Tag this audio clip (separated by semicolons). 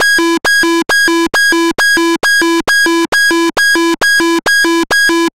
alarm; danger; fictional; indication; indicator; science-fiction; scifi; synthesized; synthesizer; target; targeted; warning